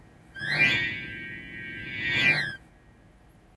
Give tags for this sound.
gesture christmas present wand ghost carol xmas

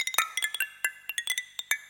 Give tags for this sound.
happy-new-ears sonokids-omni

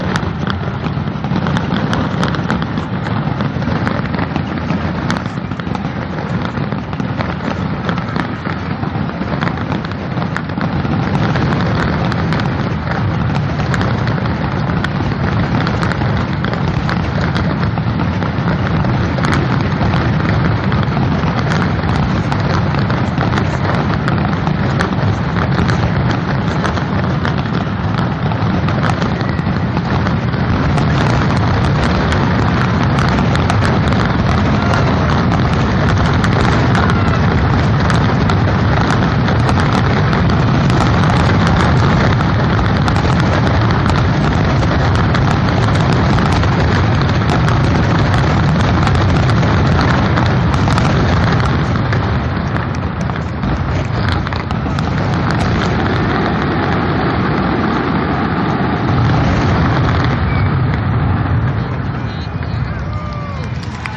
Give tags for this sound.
loud fireworks city bang pyrotechnics field-recording explosions gunshots crowd

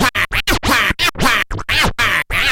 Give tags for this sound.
hiphop turntablism battle phrase stab vocal funky vinyl record cutting scratching scratch cut riff dj

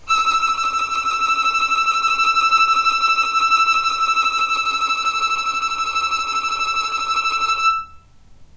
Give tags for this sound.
tremolo violin

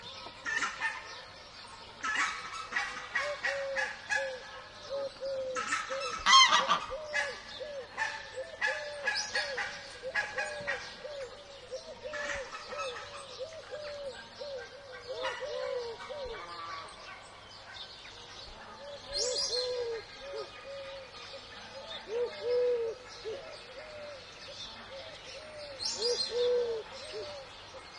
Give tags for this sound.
ambiance crane stork field-recording spring bird-sanctuary sparrow birds nature geese

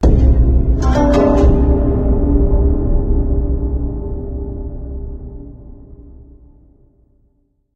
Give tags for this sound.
creepy ghost haunted horror scary

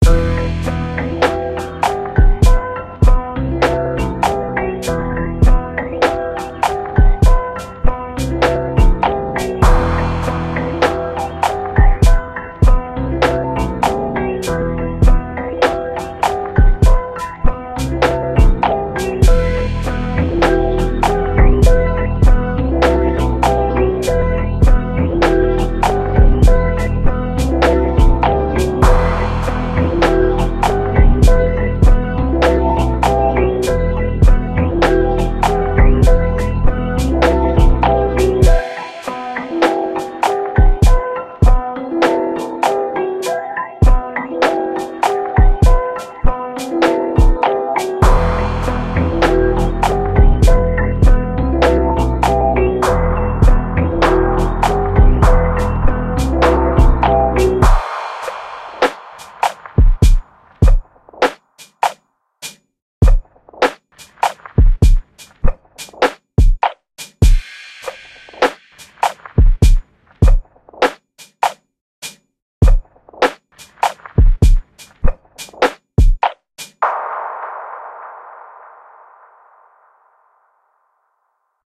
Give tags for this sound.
Ambient Atmospheric Background Cinematic Dark Horror Melody Sound-Effect Soundscape

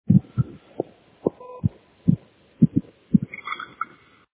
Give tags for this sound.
digital glitch lo-fi mail noise phone voice

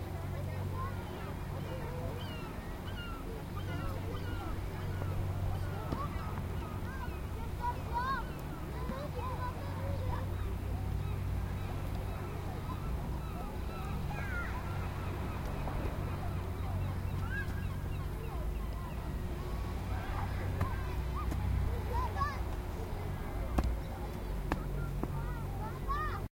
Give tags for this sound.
ambience beach field-recording france

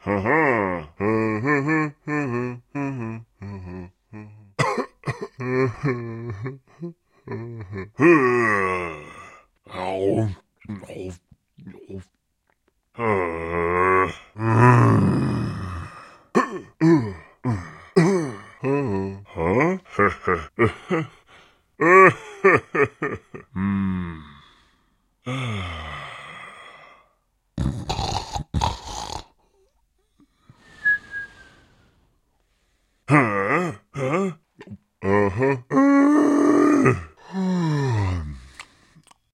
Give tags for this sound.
deep eating golem hit male male-vocal monster non-verbal surprised vocal vocal-sample voice vox

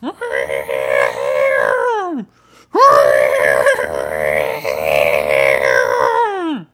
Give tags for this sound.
animal call dinosaur monster pterodactyl

